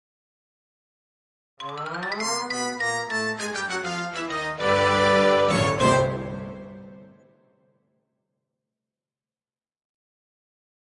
Funny TV Moment (Alternate Version)
Just an alternate version of my previously uploaded TV Moment blurb, with a xylophone instead of wood blocks.
funny, chromatic, tv, music